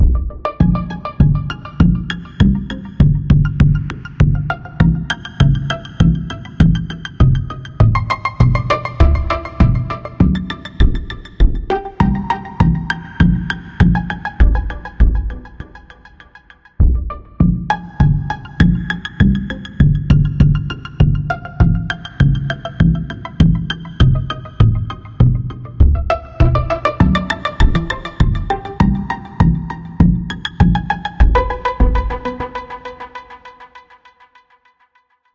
Slow cloud Synth music
I made the score in Maestro.
SFX conversion Edited: Adobe + FXs + Mastered
Keys, Synh, Electric, Chill, Key, SFX